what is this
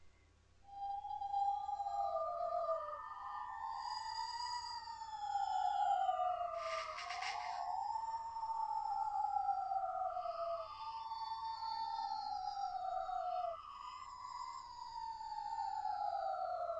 scary little ghost girl is crying
Recorded with AV Voice Changer Software